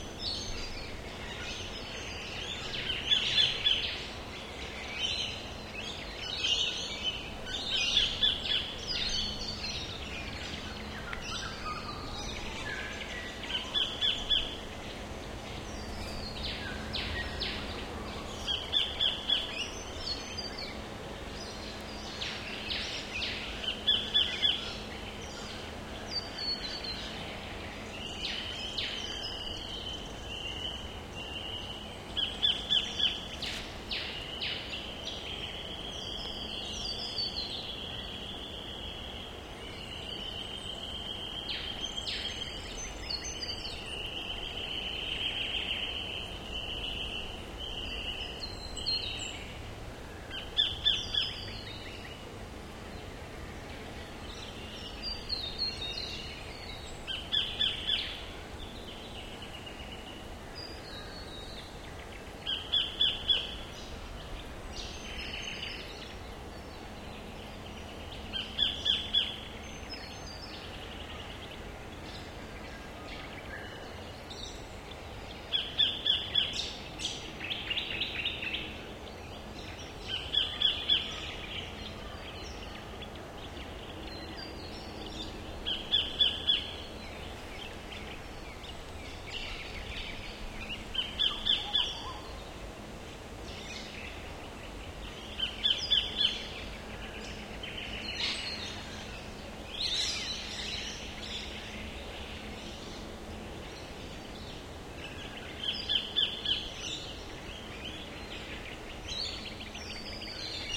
Bushland/Forest Dense Birds and Trees
Recording of ambient birds and some wind through the trees at Point Halloran. This sample has dense birds and very light wind.
Captured with a BP4025 microphone and ZOOM F6 floating-point recorder.
trees, forest, ambient, field-recording, birds, nature, bush, wind, ambience